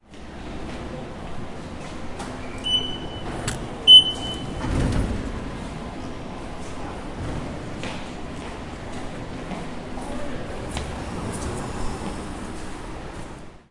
0267 Incheon airport railway
Ticket machine and automatic door from the airport to the railway. Beep.
20120605
korea, field-recording, seoul, airport, footsteps, korean, incheon, voice